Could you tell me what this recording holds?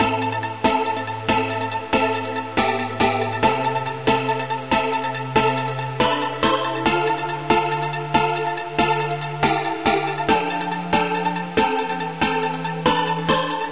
140 BGM Loop, 32 bars signifying conflict
Can be used for battle scenes, news sound tracks
Made in Ableton Live 9, all sounds synthesized using Sylenth1
electronic, loop, music, soundtrack